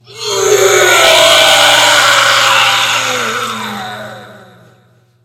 A dragon roaring. I created this effect for a production of Shrek. I recorded several takes of an actress roaring, and mixed some of them together, adding EQ, distortion, and reverb to create the resulting effect.
Recorded with an SM-57.
Actress: Nicole Lewis